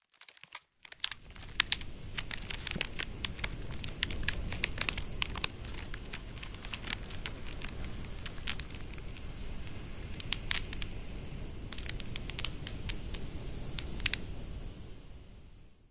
A soundscape of a bonfire in the middle of the woods at night